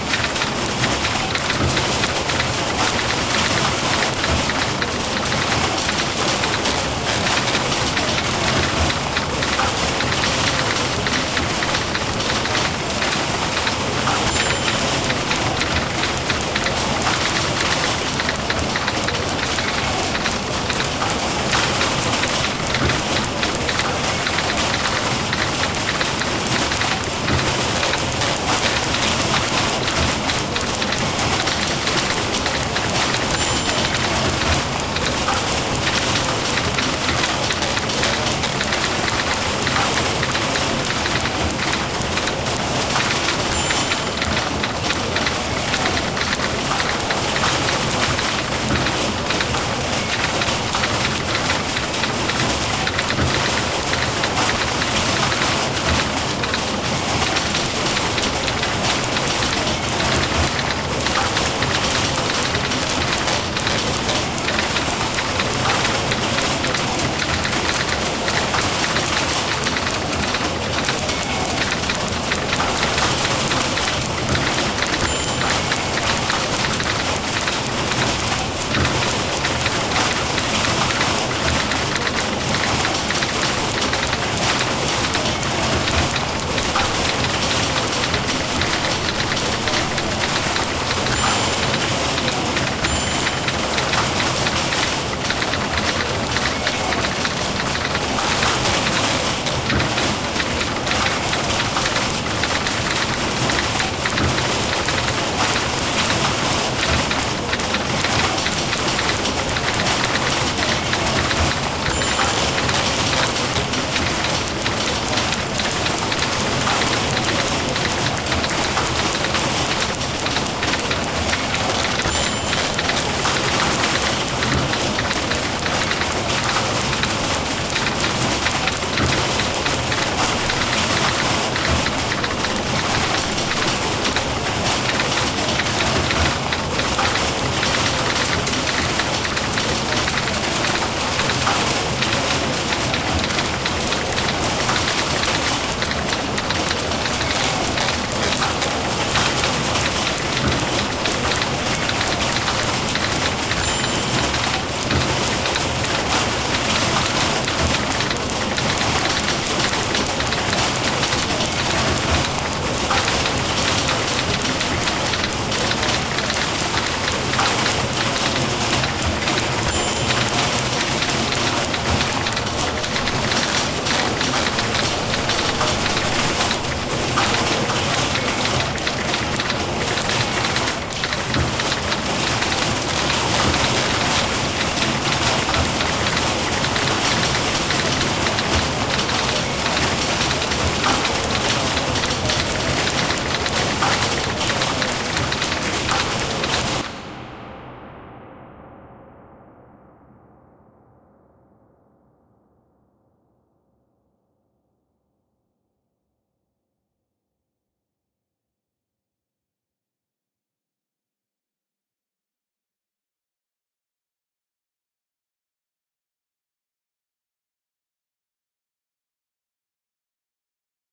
This is a background sound I've created designed to imitate the noise produced by a vast collection of typewriters being worked on in a large warehouse environment.
There are 8 different typewriters here. On some channels I stuck a lo pass and some big room reverb, and on others I added a little compression to bring them to the forefront.
The whole had a hard limiter to -6 on the top.